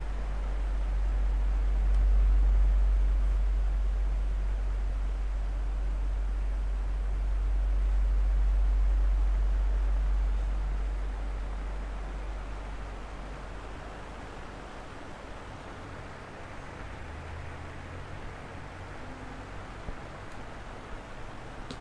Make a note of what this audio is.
Fan Buzz 2
denoised, drone, edited, effect, fan, foley, free, h5, high, high-quality, noisy, Oscillation, pattern, Pulsating, quality, Repeating, sample, sound, stereo, zoom, zoom-h5